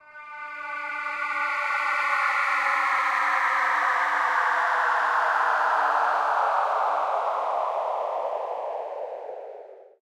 Alien Spaceship
Alien Space-Ship fly-by, made for my mus152 class